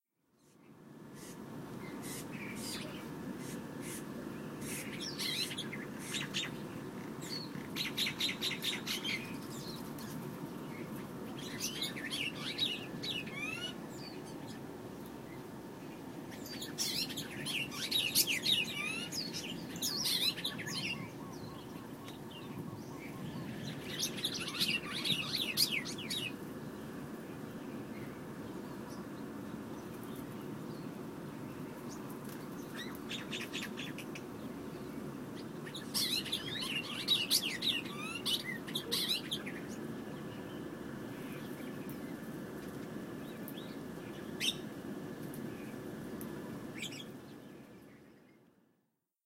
Recorded with an iPhone. Birds chirping outdoors. Some wind, but good bird chirp noise.
ambience, relaxing, ambiance